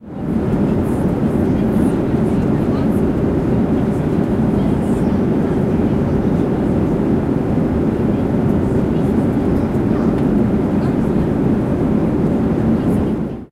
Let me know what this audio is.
Flying High Up In The Sky, 03
Flying high up in the sky ! Flight attendant walks by with a carriage and serves.
This sound can for example be used in film scenes, games - you name it!
If you enjoyed the sound, please STAR, COMMENT, SPREAD THE WORD!🗣 It really helps!